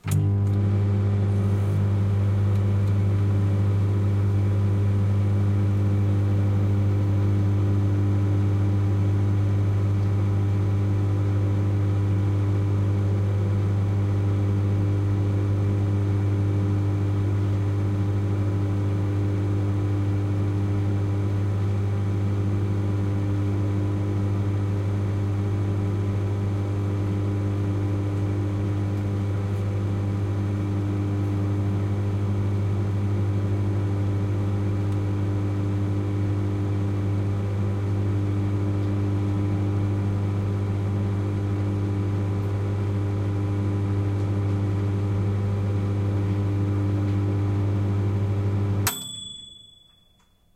This recording is of my microwave cooking, including the ping from the timer. The sound is a low rumble as its operational for about 45 seconds then there's the microwave time ping's to mark the end of the timer.
It was recorded using the XYH-6 Microphone on my Zoom H6.
Compression and clip gain was used during post.
ZOOM-H6, Machine, Appliance, ping, Microwave, Kitchen, Hum, Household, Cooking, Beep, rumble